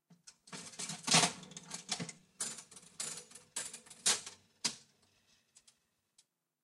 arcade old slot machine
Recordings of arcade games and atmos from Brighton seafront